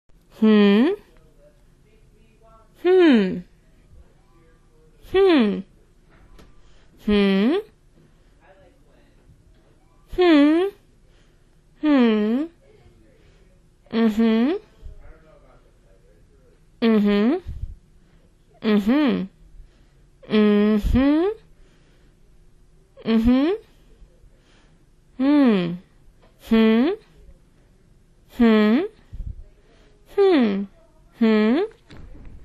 A young woman saying "hmm" and "mm-hmm" with various different inflections